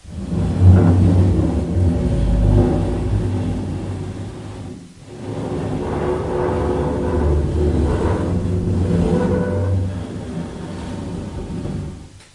Metal Drag One
dragging, industrial, metal